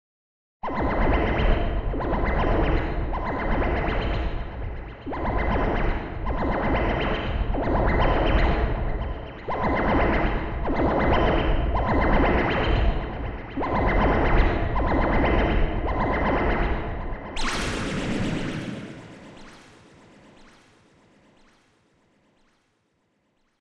Heavily processed VST synth sound using various filters, reverbs and phasers.